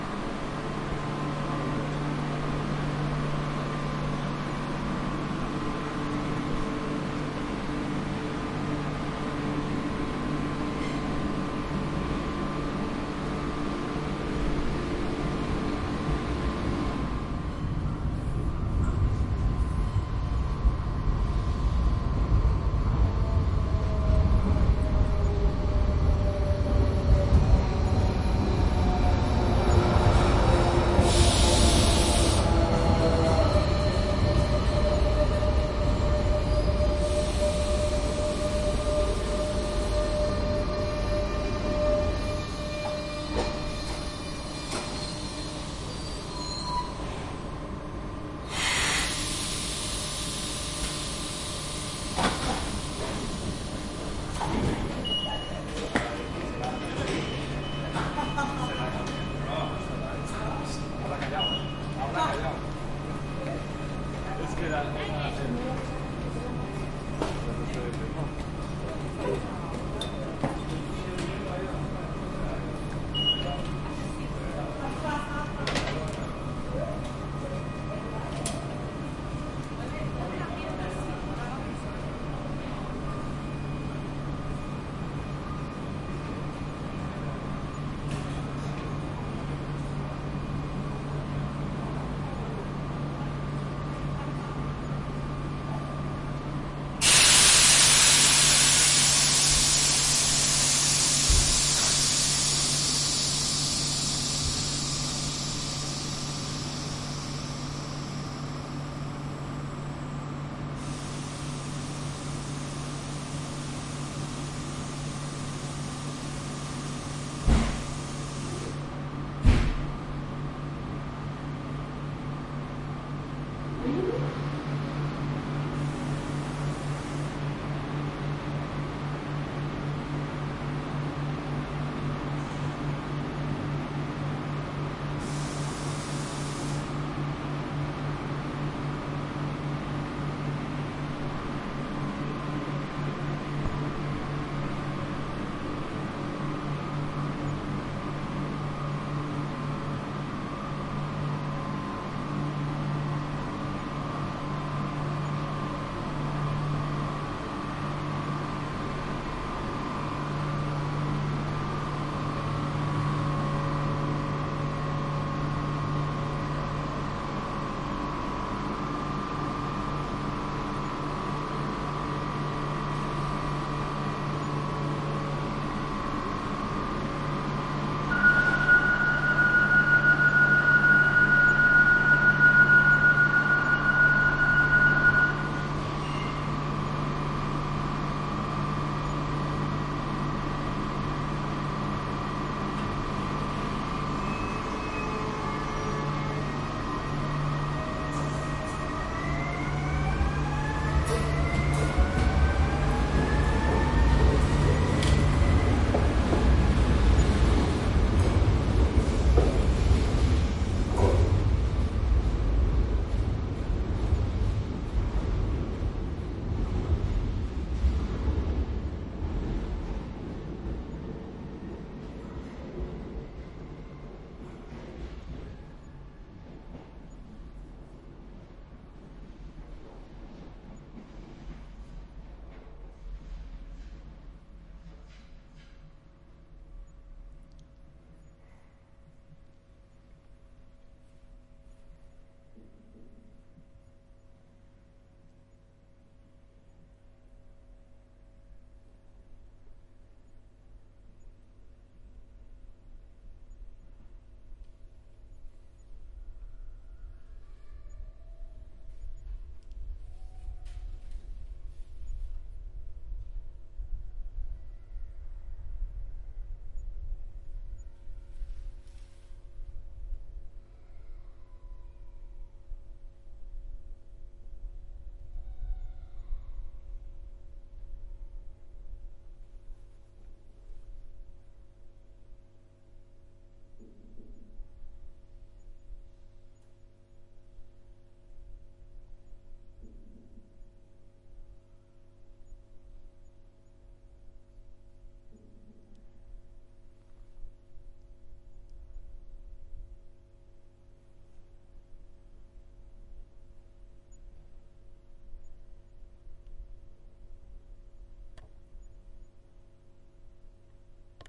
The following audios have been recorded at a bus and train station at Gandia (Valencia). They have been recorded late in the afternoon on the month of december.
bus, coach, crowd, movement, talking, Train, travel, travelling, Valencia, walking